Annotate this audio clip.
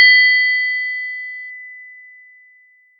Ping!
If you enjoyed the sound, please STAR, COMMENT, SPREAD THE WORD!🗣 It really helps!
no strings attached, credit is NOT necessary 💙
Alarm, Alert, Bell, Bing, Cartoon, Door, Film, Game, Mobile, Notification, Phone, Ping, Shop, Store, Visit